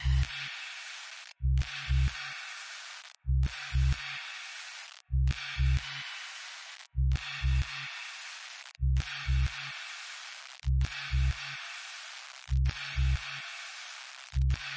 130 bpm C Key 02
bass, synth, experimental, 130, loop, noise, bpm, processed, electro, rhythm, rhythmic, electronic, design, beat, lfo, distortion, quiet